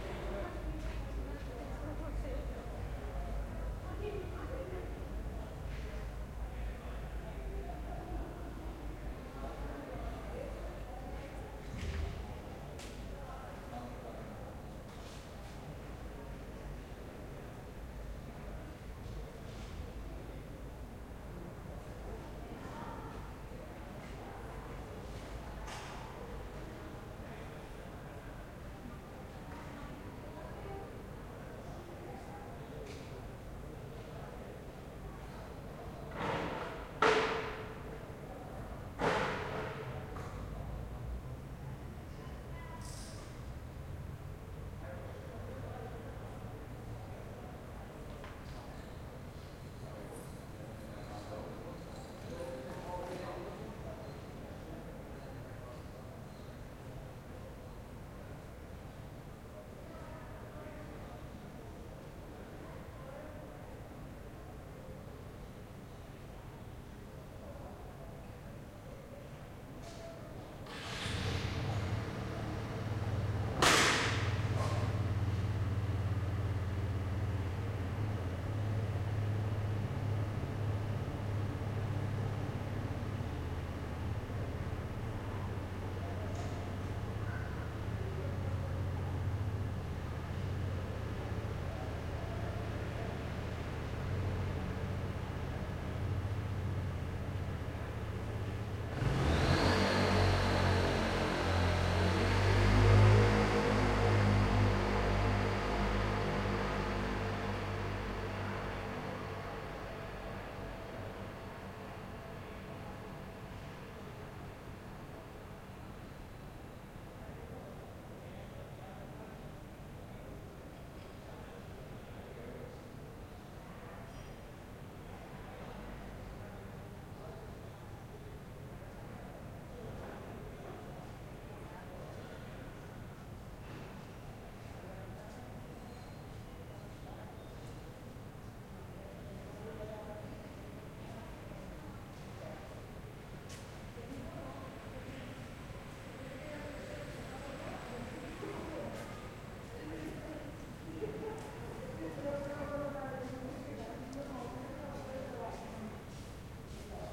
Ambience Urban Night Plaça Comerç
Urban Night Ambience Recording at Plaça Comerç, Sant Andreu Barcelona, August 2019. Using a Zoom H-1 Recorder.
Ambience, Night, PlComerc